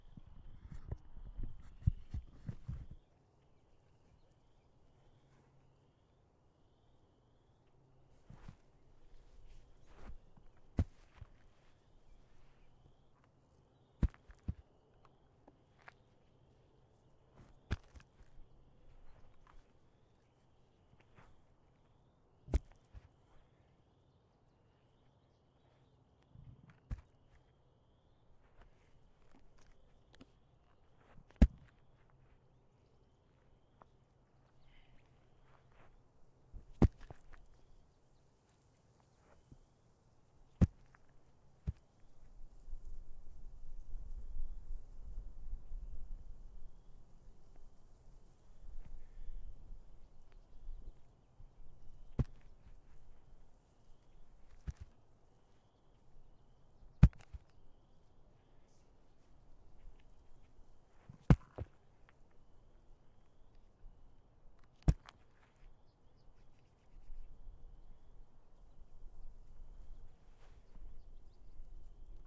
HEAVY BATTERY THUD IN GRASS
Sounds of a heavy battery falling onto the grass. You can really hear the deep bass thud.